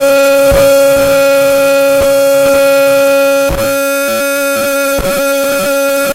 Weird FX Loop :: Almost Òrganic Nasal
This almost organic sounding sound loop, resembles somewhat of an ethnic instrument. It was created with a no-input-mixing-desk controlled and modulated feedback "noise".